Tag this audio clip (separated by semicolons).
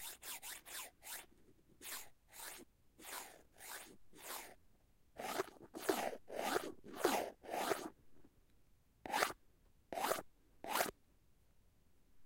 pen; ruler; rulerandpen